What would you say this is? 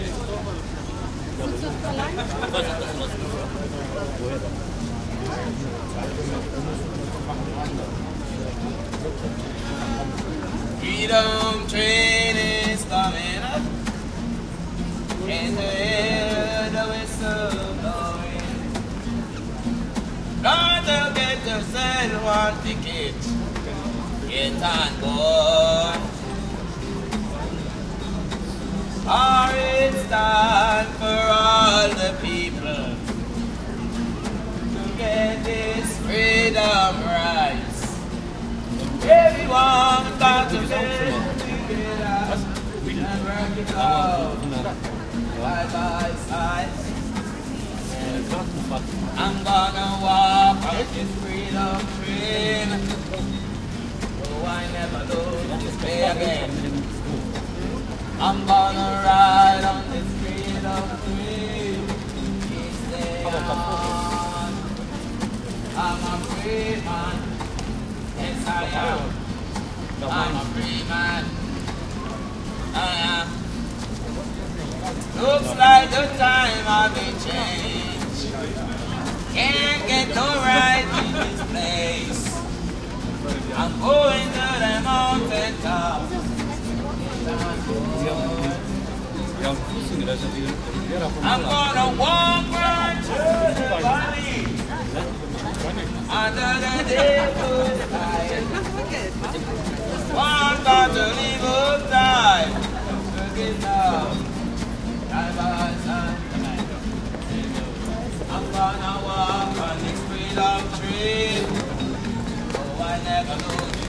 Jamaican street musician playing and singing in a pedestrian street in the center of Cologne, Germany. Marantz PMD 671, Vivanco EM35
street, song, city, guitar, field-recording, jamaica, people